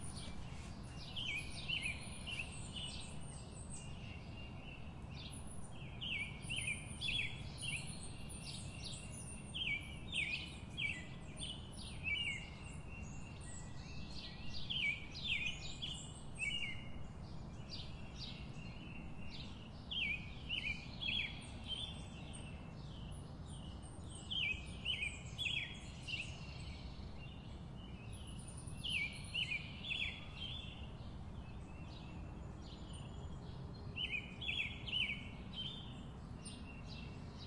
Bird Singing-09
Birds signing outside my home office in Virginia. Recorded with a Tascam DR 40.
birds, birdsong, field-recording, morning, nature, spring, Virginia